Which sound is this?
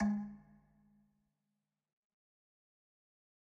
Metal Timbale 004
pack, timbale